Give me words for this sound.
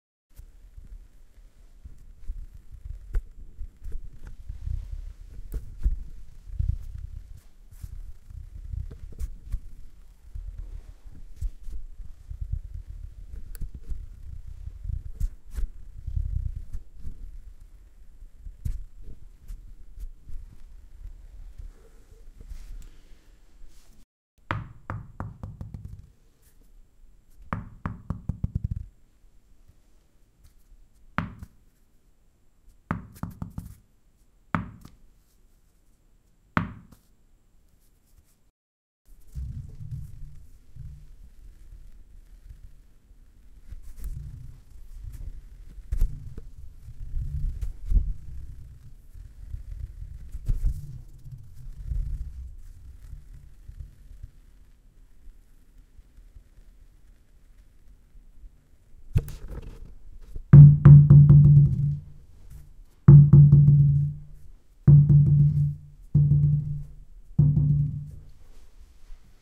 Tennis ball rolling on a stone bathroom floor and inside a bathtub.
bathtub, tub, floor, ball, pd, bathroom, rolling, tennis, stone